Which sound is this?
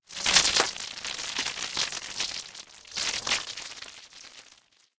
zombie eating lukewarm guts
This is a recording of a zombie slurping up the guts of a victim killed a few moments ago.
zombie, ripping